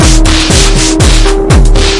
Dusty Piano Loop 5
I little loop series with a piano. These ones are really fun. I made it with Digital Thunder D-lusion. DT is an analogue drum machine.
quick, loop, experimental, piano, upbeat, weird, novelty